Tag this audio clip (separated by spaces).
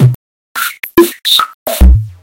glitch experimental loop drum kick idm beatbox drumloop percussion bassdrum 108bpm snare